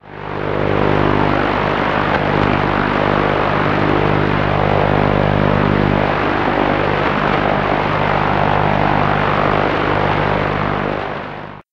buzz pulse 7mhz
The sound is continuous digital modulation (buzzing) from a shortwave radio between 7-8 MHz. The buzz is around 100Hz with atmospheric background noise.
digital, noise, pulse, shortwave-radio